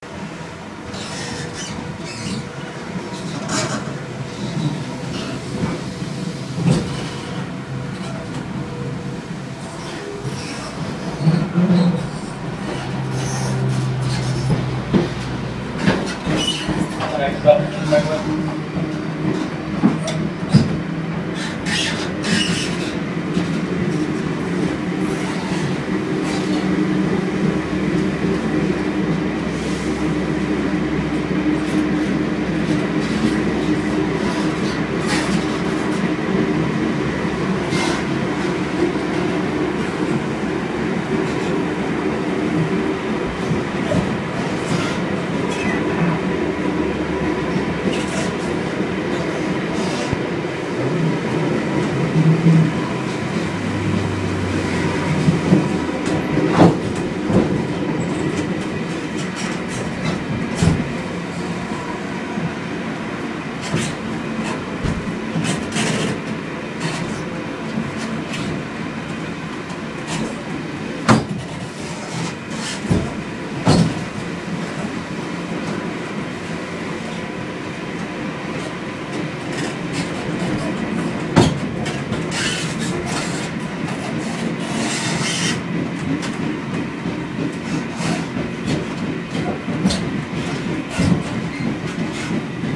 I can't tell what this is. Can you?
sydney train
The sound of a train travelling to penrith I think, I recorded it on an IPod Touch so the quality is pretty cheap but it does the job.
australia, background, creaking, metal, noise, penrith, sound, sydney, train